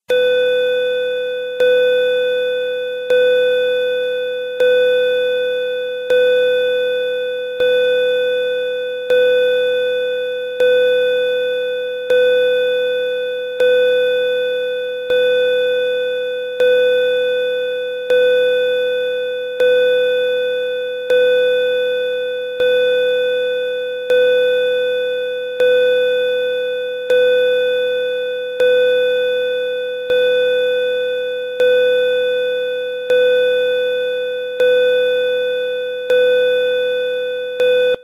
20 Pulse Bell Tone
This Is A Common Middle School Or High School Bell Tone
Phone, Car, Field-Recording, High, Ting, Driving, FM, Old, School, Ringing, Tone, Plate, Bell, Middle, Common, Ding, Open, Door, ring, Dial, Tune, Antique, Station, Close, Radio, Noise, Interior, Telephone